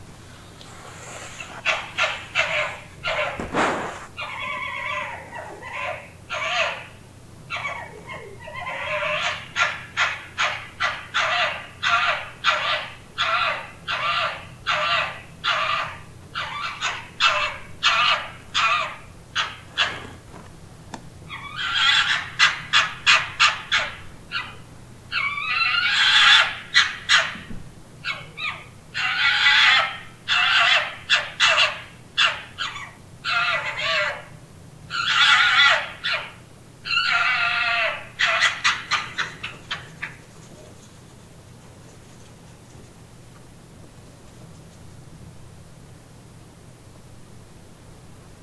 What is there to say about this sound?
Calls of an urban fox, recorded at 1:10am in Portsmouth UK with a One Plus 6 mobile.